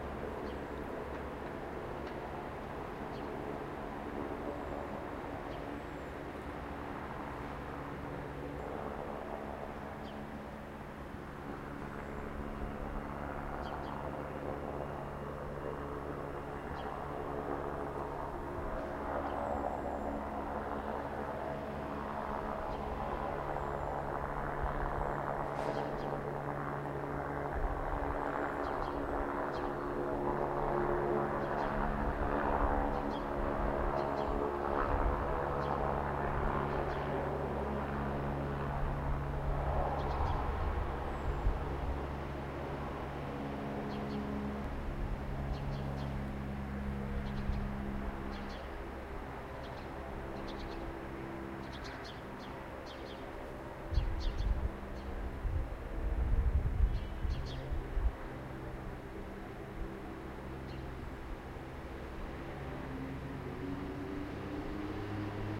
North London exterior atmos. Goodyear blimp passes overhead. ZoomH1.